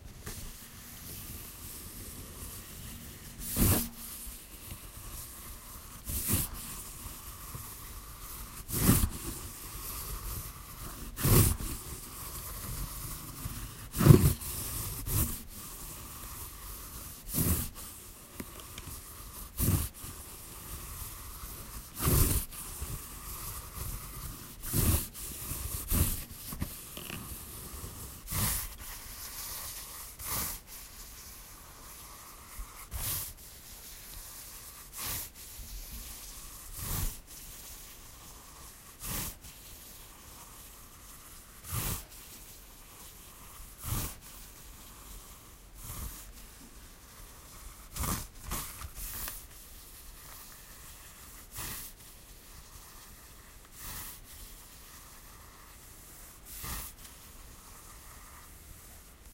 reading braille
Moving fingers across the paper with braille text. Braille script is used by blind people to read and write. There are combinations of dots which represents alphabetic characters, numbers and symbols. In this sound, you can hear how it sounds when someone is reading.
blind, paper, visually-impaired, braille, reading, stereo